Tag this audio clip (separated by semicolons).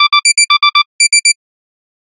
beep; electronic-clock